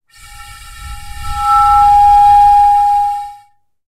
glass - blowing over glass bottle 05
Blowing over the opening of a glass bottle.